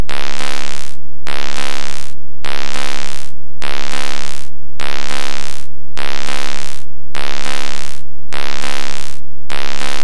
These clips are buzzing type audio noise.
Various rhythmic attributes are used to make them unique and original.
Square and Triangle filters were used to create all of the Buzz!
Get a BUZZ!
Artificial, Buzz, Buzzing, Factory, Industrial, Machine, Machinery, Noise